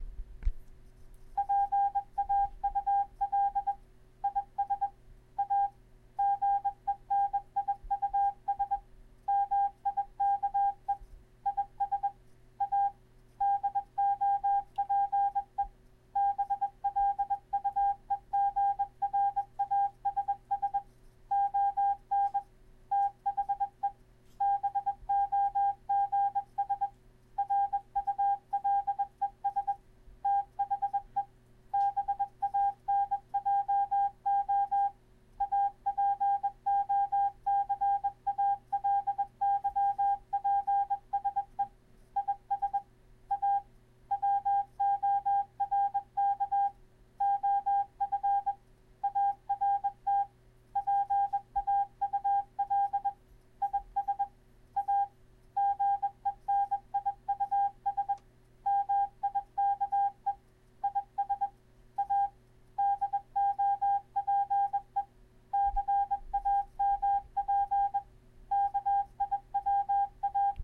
Morse code from morse code generator. It says 'Paul is awesome Mike is a dope etc...' Zoom h1